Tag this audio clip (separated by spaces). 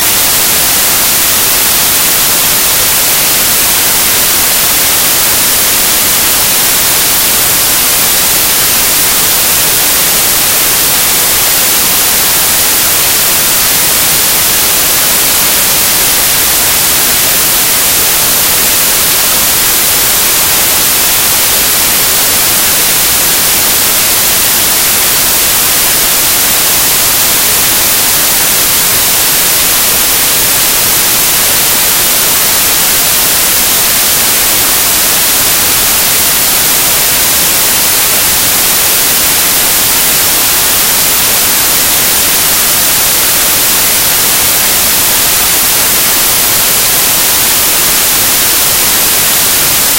audacity generated noise white